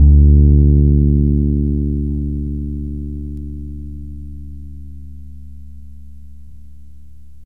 The first eight notes are on the low A, the next four sets of five notes each from the D G C and F strings, and the rest on the high Bb string. If these are useful to you, or if I decide I need to, I will record a set with a growly tone and vibrato.
contrabass, electric-fretless-bass